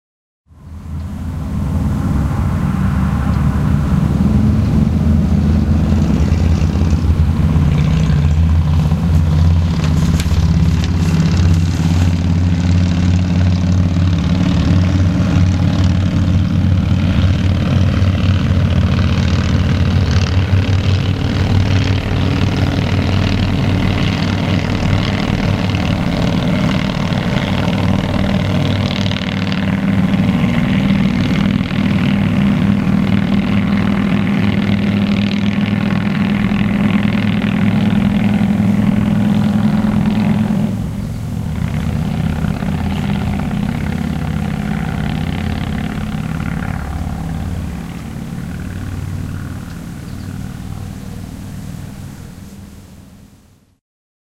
br Harleys Utah Hill 1
Harley motorcycles going up a hill.
motorcycles, harley, field-recording